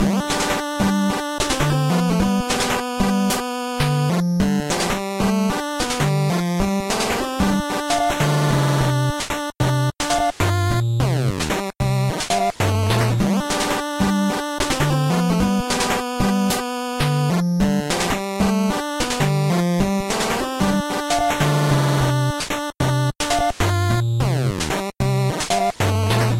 Inspired by Undertale,(bc of the "leitmotif" thing), this was all made in famitracker in 2hrs and I hope you like it!
Chan Records